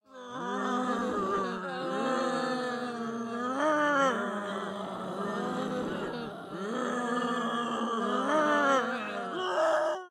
Multi Groan 1
Multiple Zombie groans
zombies, mob, group, brains